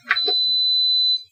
click beep long
turning off my fan
beep, click, long